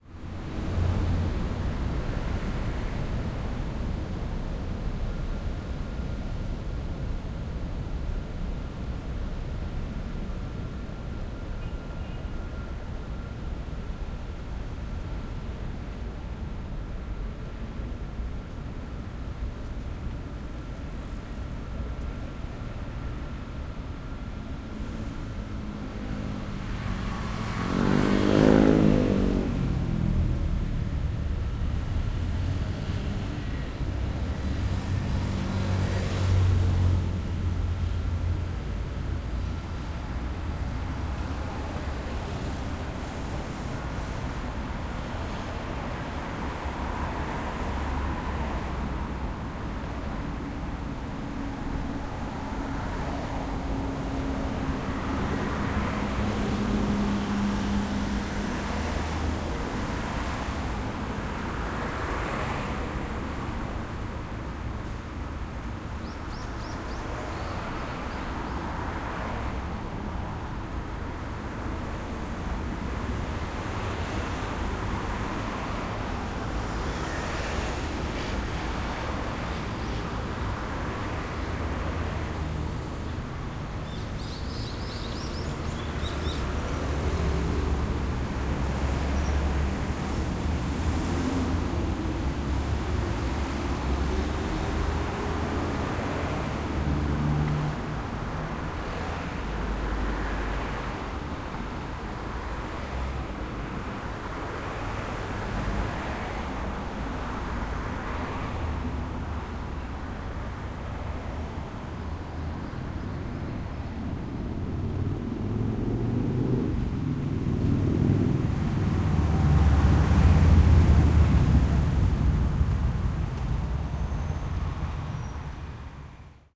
0319 Machine and traffic
Quiet machine, traffic and birds. And a motorbike.
20120620
birds, field-recording, korea, machine, motorbike, seoul, traffic